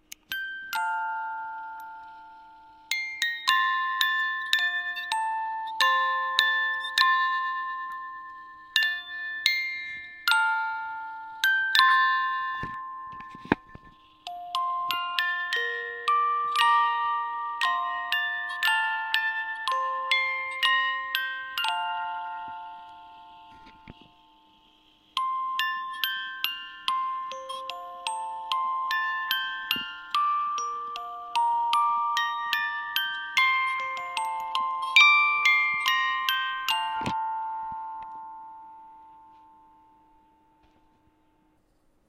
glockenspiel (made by "Sankyo, Japan") playing "Jingle bells")
jingle-bells, glockenspiel